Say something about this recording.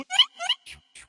The sound of a virtual chipmunk. Part of my virtual beasts pack.